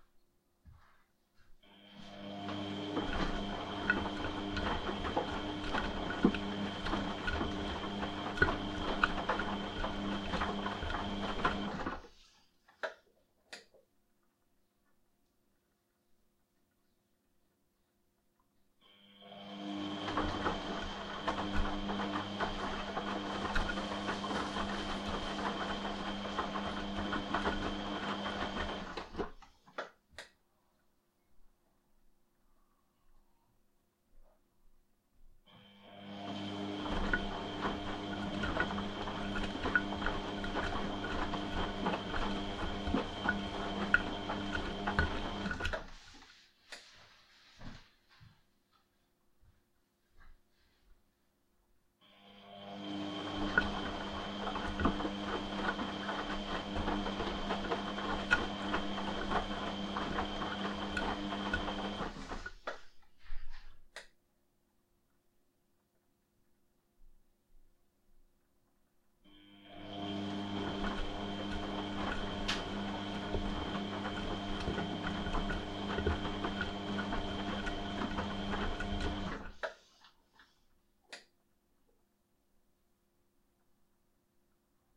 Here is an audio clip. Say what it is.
washing machine cycle 2
Washing machine cycle
appliance, cycle, machine, noise, rinse, wash, washing, washing-machine, water